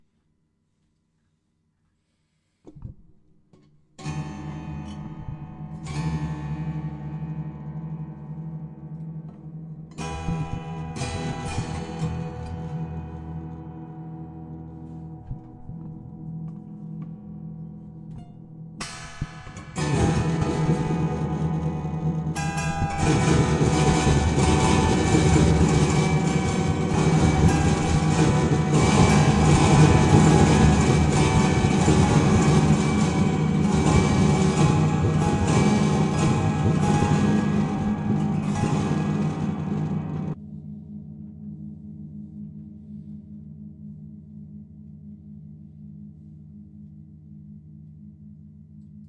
Hi, forgotme.80 years 4th oct, that tells you that I am not very prductve any longer. My jobs are not easy to understand, so is todays as well. I ty to give honor tto the Lord when he creates light. I speak to you and you can follow the text in a spanish bible, very firsta verse in Genesis. How on earth can you illustrate such a awssome moment, I have no idea how He did it. I think n the sw switching on the megalihts on big arenas, like superball. A gigantic dieselelectric power station starts up and the clonk of super switches are heard. tried to give you chills, You should listen with a very good freq curve and do not turn down when the final comes . Whole house should heare . Enjoy.
curiosity, sfie, fantasy